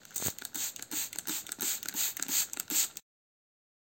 A plastic spray bottle cleaning a surface